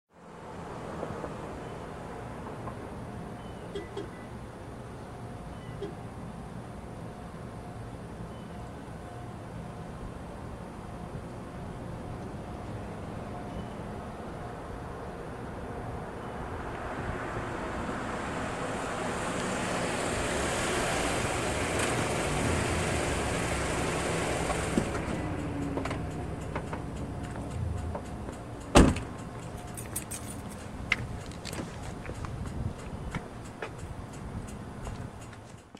Pulls up and Parks Car
Car pulling up
car, vehicle, engine